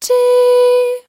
Solfege - Ti
Warming up. TI! C4. Recording chain Rode NT1-A (mic) - Sound Devices MixPre (preamp) - Audigy X-FI (A/D).
voice, vocal, ti, vox, solfege, female, singing